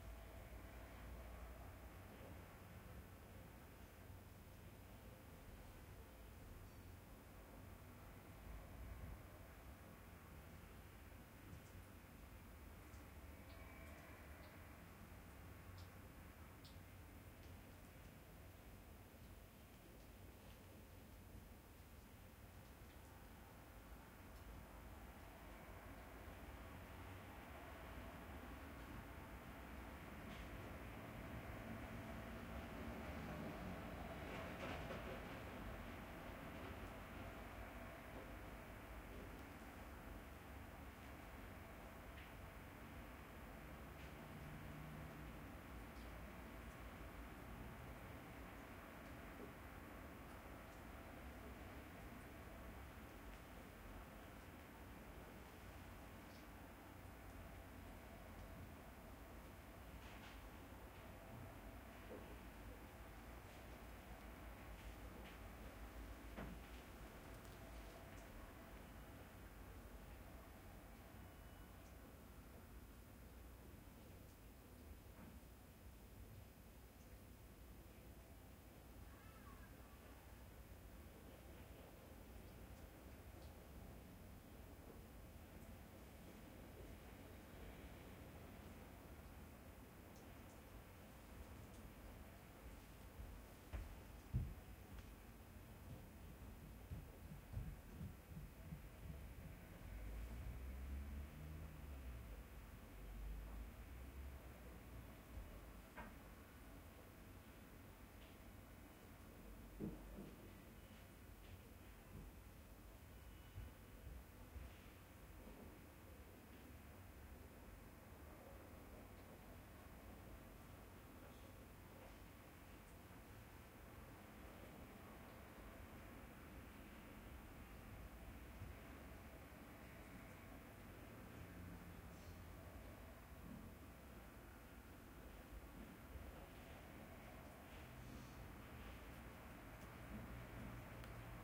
026-Generic Urban flat AMB
City, flat, ambient